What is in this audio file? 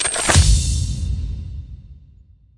Loot box open
A loot box being opened which I made for a visual novel:
video-game, impact, crack, opening, break